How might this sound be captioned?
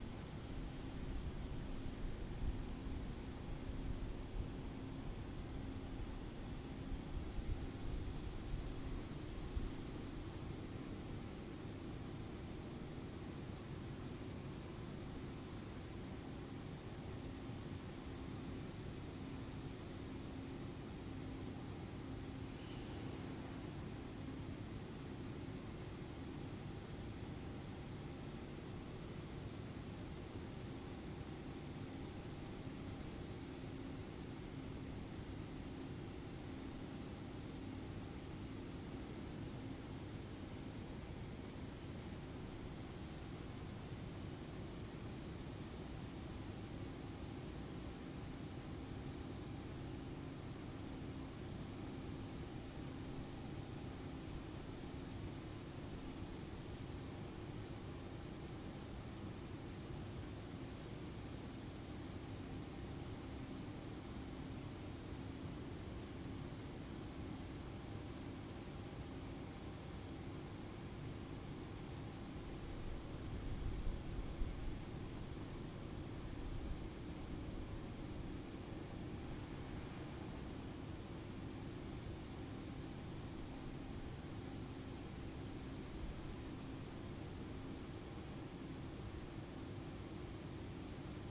Edgar Thomson 3
General ambiance, US Steel Edgar Thomson Works, recorded from the historical marker on Braddock Ave, near 13th street, in Braddock PA. Zoom H2